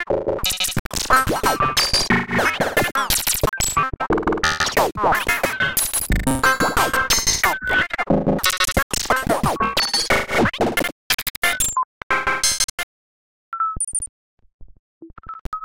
Glitch rythme 01
rythme, rhythm